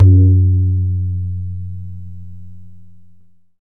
Bass stroke ghe on a tabla.